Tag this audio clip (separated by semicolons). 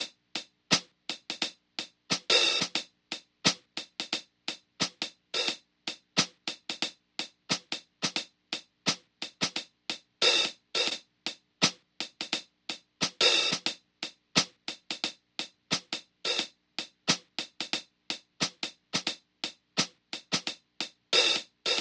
76; hat; sample; lofi; BPM; drums; Loop; pack; Hi; hiphop; chill; Loops; funky; drum; music; samples